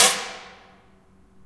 One of a pack of sounds, recorded in an abandoned industrial complex.
Recorded with a Zoom H2.
city, clean, field-recording, high-quality, industrial, metal, metallic, percussion, percussive, urban